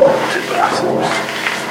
A weird and a little spooky sound that I somehow have in my collection for years now, but I don't have the slightest idea of how or when it was created...I think it has something unique. I wish it was longer.
noise, sly, sly-voice, voice